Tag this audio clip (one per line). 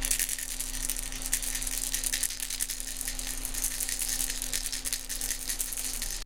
baby
rattle